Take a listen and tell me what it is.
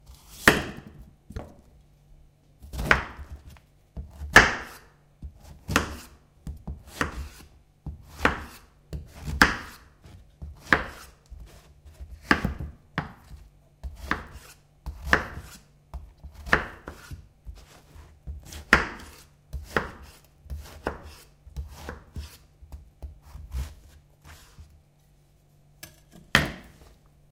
corte sobre tabla
cortando sobre tabla
corte, cut, cutting, coup, chopping, chop